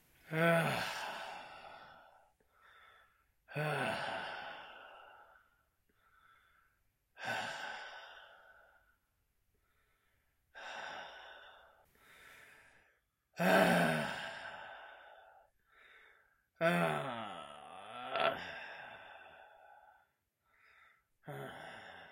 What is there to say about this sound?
Man Sighing
Variation of a man groaning and sighing.
Recorded with a Zoom H4N recorder and a Rode M3 Microphone.
male-sighing, sigh, male-groaning, man, groan